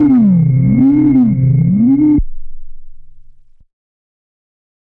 Alien Alarm: 110 BPM C2 note, strange sounding alarm. Absynth 5 sampled into Ableton, compression using PSP Compressor2 and PSP Warmer. Random presets, and very little other effects used, mostly so this sample can be re-sampled. Crazy sounds.
110, acid, atmospheric, bounce, bpm, club, dance, dark, effect, electro, electronic, glitch, glitch-hop, hardcore, house, noise, pad, porn-core, processed, rave, resonance, sci-fi, sound, synth, synthesizer, techno, trance